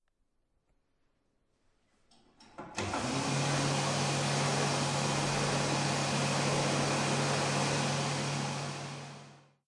drying machine
Someone drying his hands on a heat machine on a bathroom. Recorded with a Zoom H2. Recorded on a Campus Upf bathroom.
noise, wind